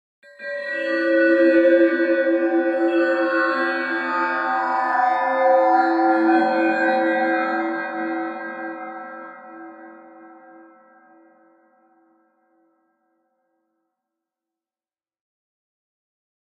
Distorted glass pads on a 3 note sound
Recorded and processed in Audacity